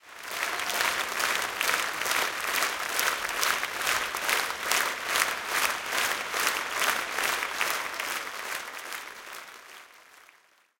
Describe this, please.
crowd applause theatre
theatre, crowd, applause